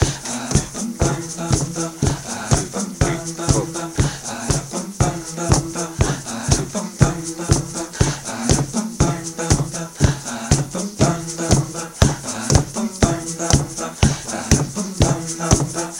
WASH2 Percussion
A collection of samples/loops intended for personal and commercial music production. For use
All compositions where written and performed by
Chris S. Bacon on Home Sick Recordings. Take things, shake things, make things.
free, guitar, voice, original-music, Indie-folk, synth, sounds, vocal-loops, loop, drum-beat, drums, melody, acoustic-guitar, samples, Folk, beat, piano, looping, indie